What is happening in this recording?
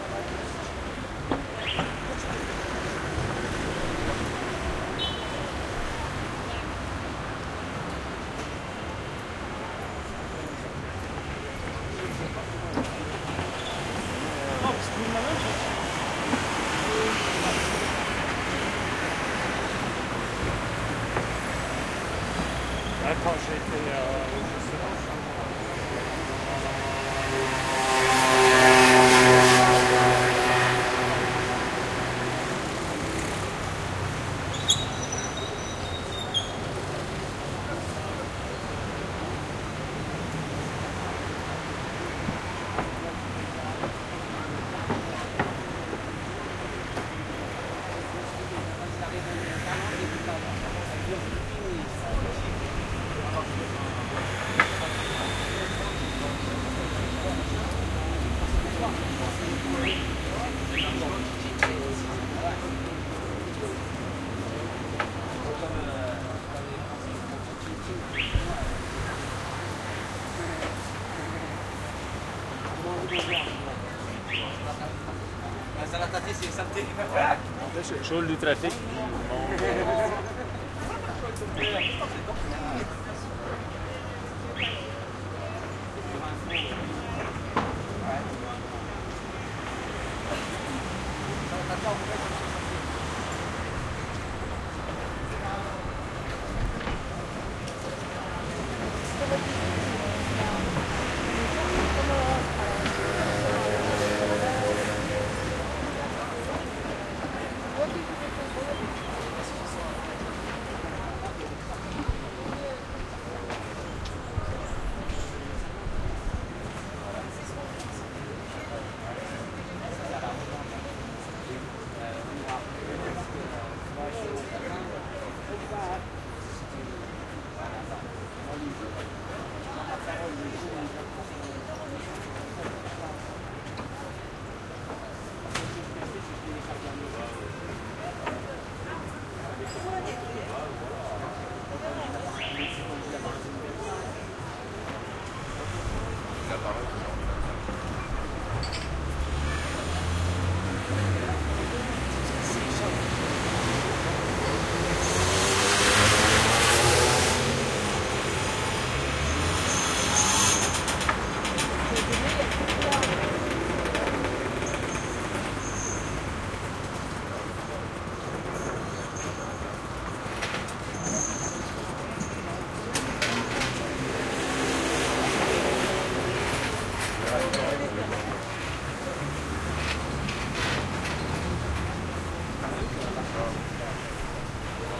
street old city wood sidewalk traffic mopeds slight echo cafe voices Marseille, France MS
city, France, Marseille, mopeds, old, sidewalk, street, traffic, wood